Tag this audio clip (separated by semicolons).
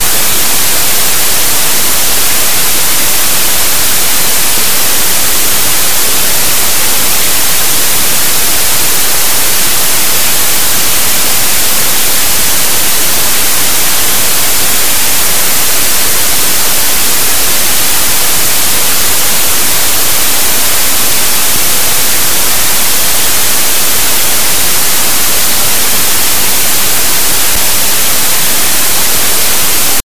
digital; noise; powered